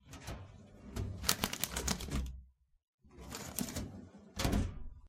cabinet draw
A metal slide drawer full of folders being opened then closed.
office, drawer-open, drawer-close